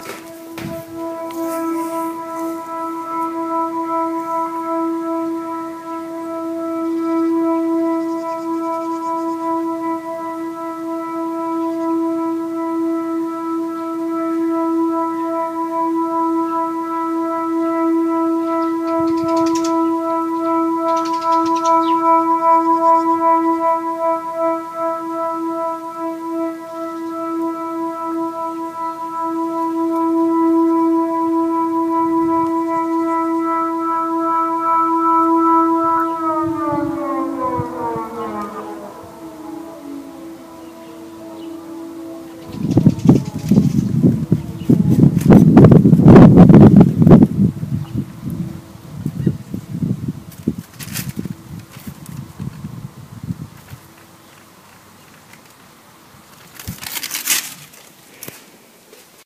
Air raid sirens being tested on May 2nd